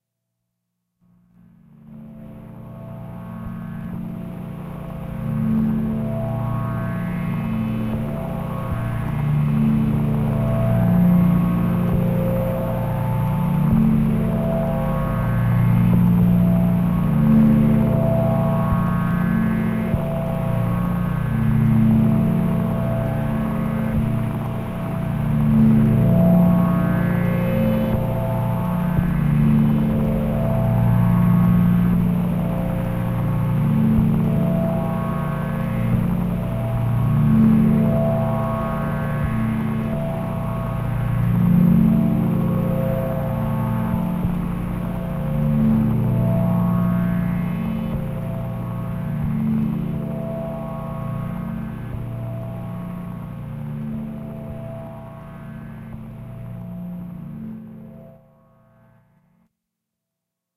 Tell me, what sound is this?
Scifi Synth Drone 207
From series of scifi effects and drones recorded live with Arturia Microbrute, Casio SK-1, Roland SP-404 and Boss SP-202. This set is inspired by my scifi story in progress, "The Movers"
noise, sampler, drone, casio, microbrute, arturia, synth, dronesound, sp404, sk1